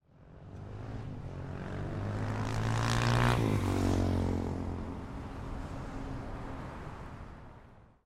Motorcycle Pass 2
A motorcycle passing by with freeway traffic.